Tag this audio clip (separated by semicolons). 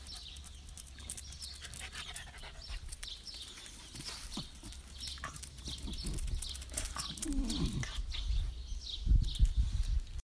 audio; Dogs; walking